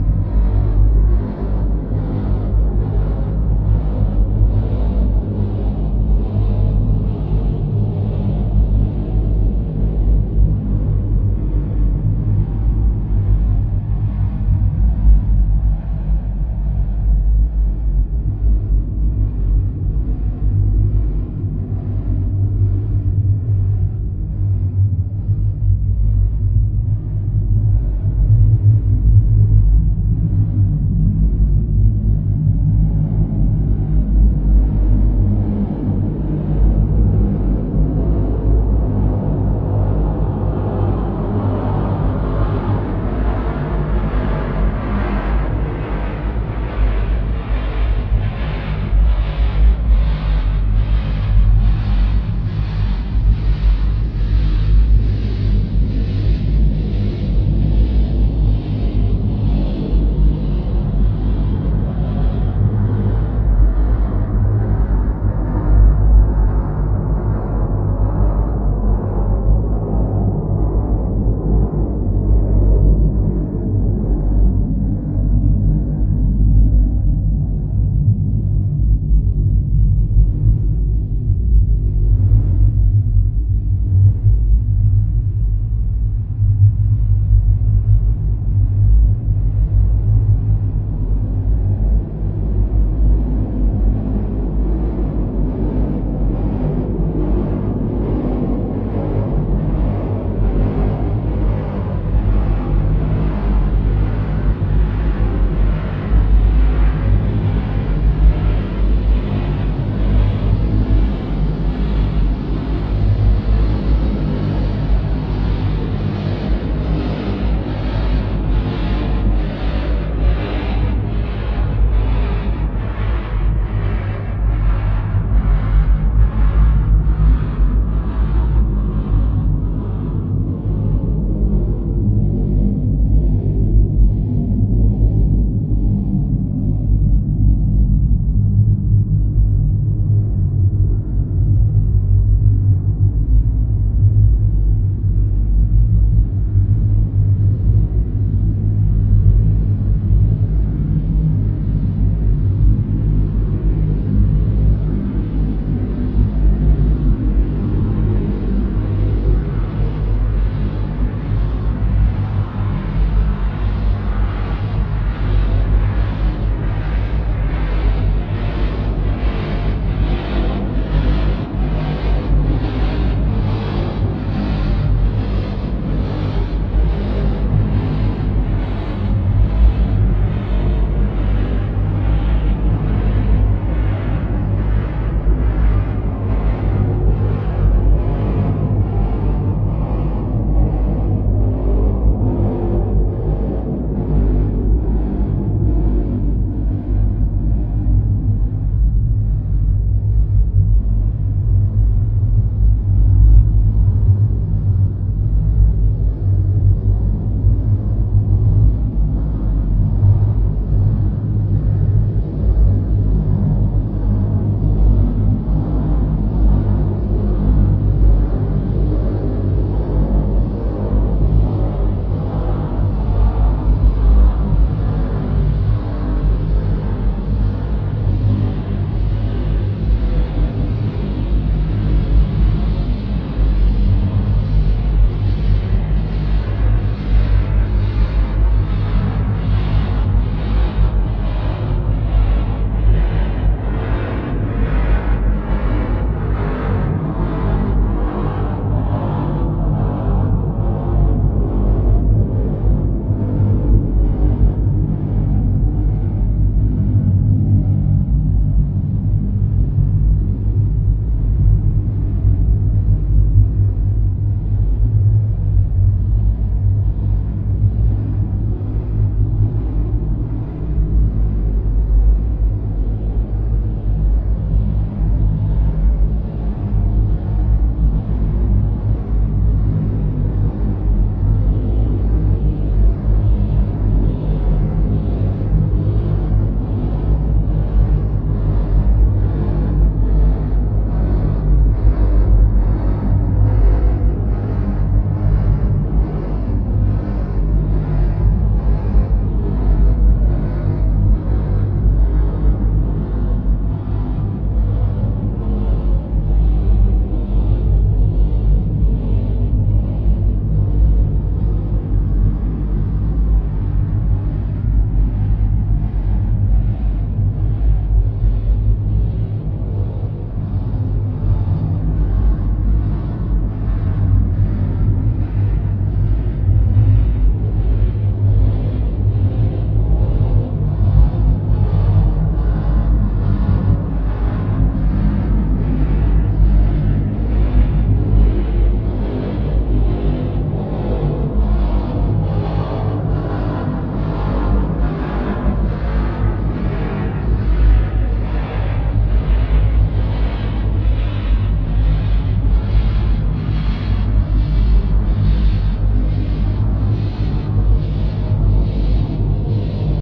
atmospheric noise
Audacity created soundscape.
Maybe use it as a part of your own sound composition.
I hope that it is useful for someone.